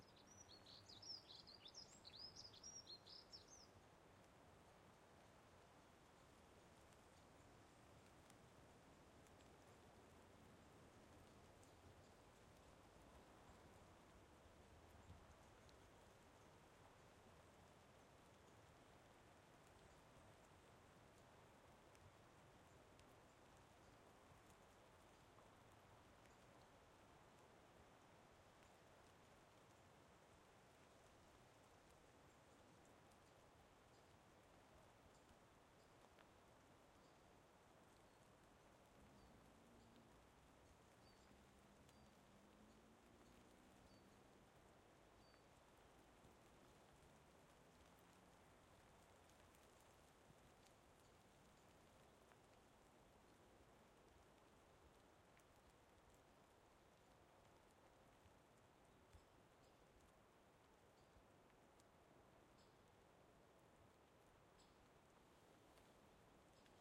Forest, light rain
A forest / woodland, during this recording it starts to rain lightly
Recorded on a stereo Audio Technica BP4025 into a Zoom F8 Mixer
branches, drizzle, forest, rain, trees, wind, woodland, woods